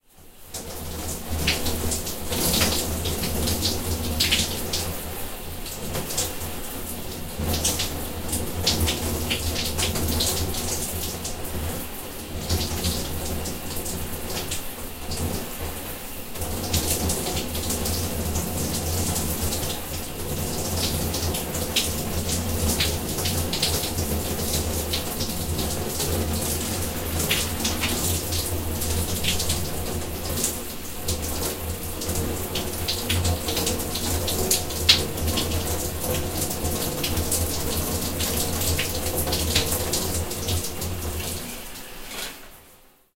Shower in small bathroom recorded from doorway 5 feet away with shower curtain closed into a metal bathtub using a Sterling Audio ST51 condenser mic. Hand used to vary water flow to simulate actual bathing.
ambient, bathing, bathroom, plumbing, shower, water